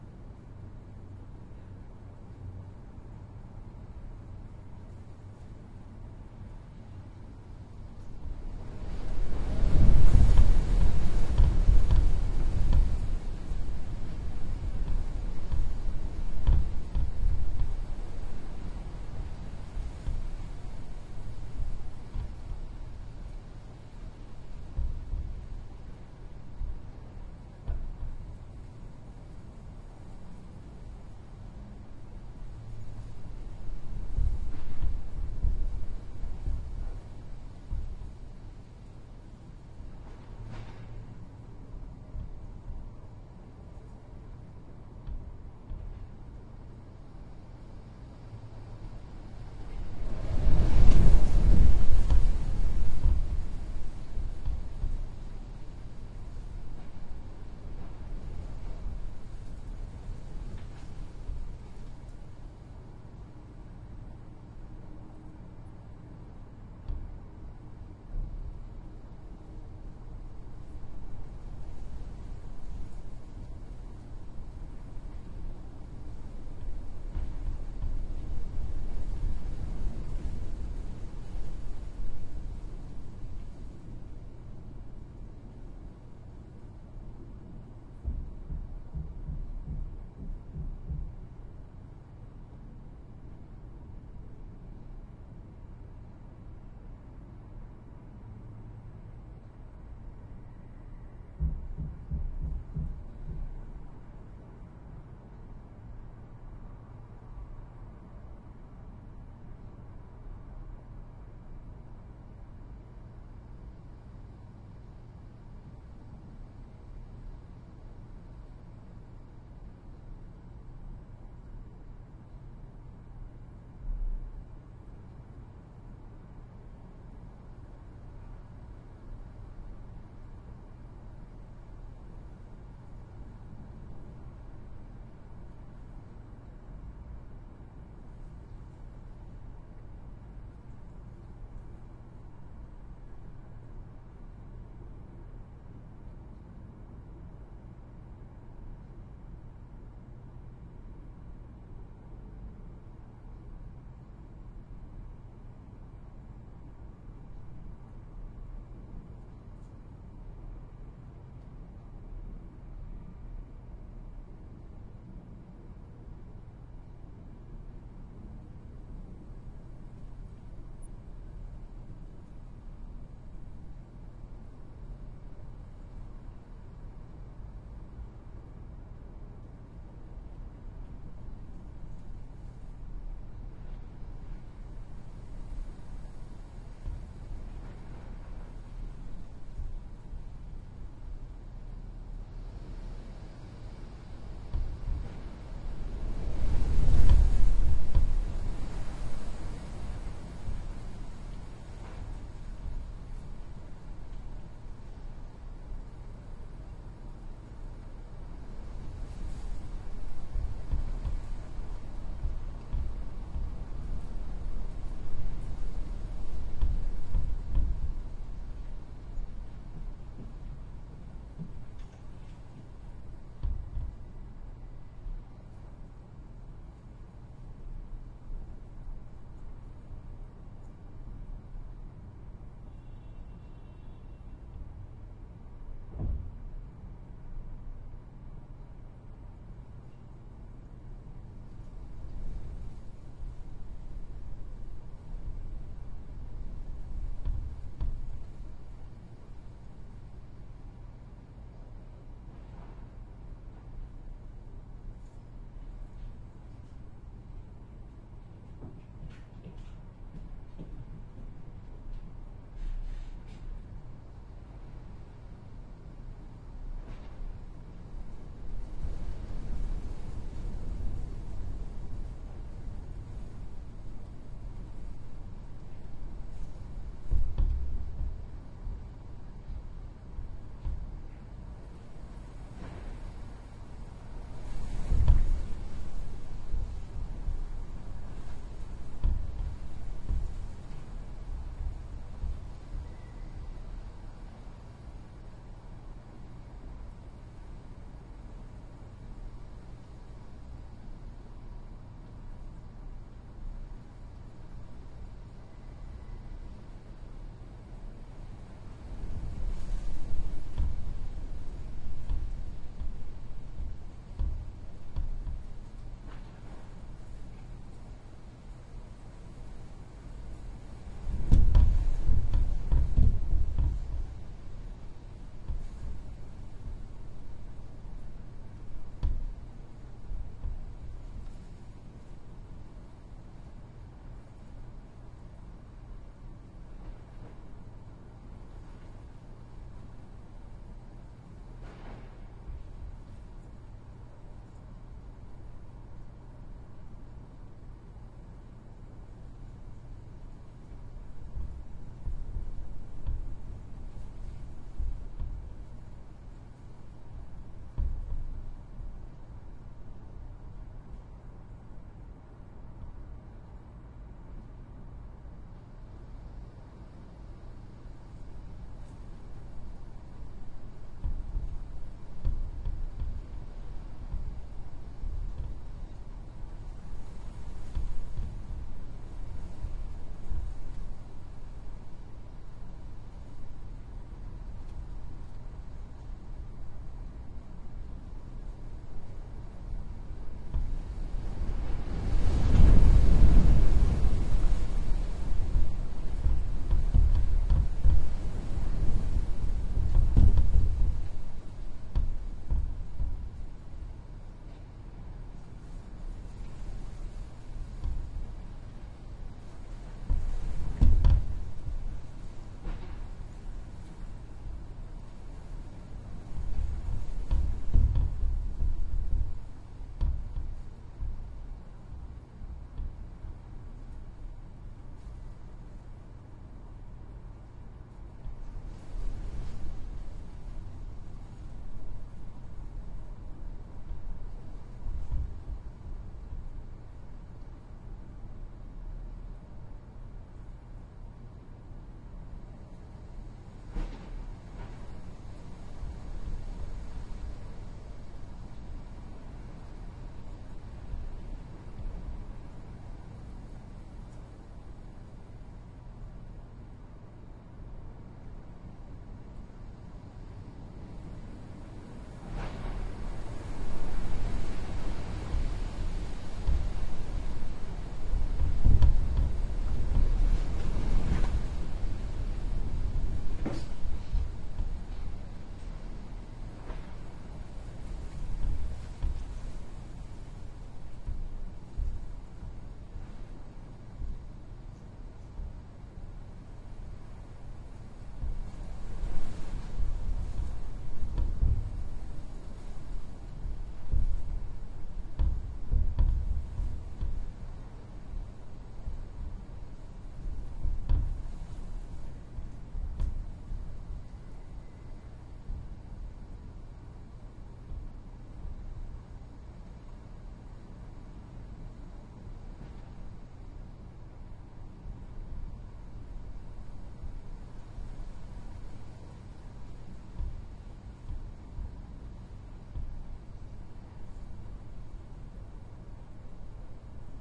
Rattling window, while stormy outside. Rode NT1-a microphones, Shure FP24 preamp and Sony PCM-D50.
wind, field-recording, window, storm, rattling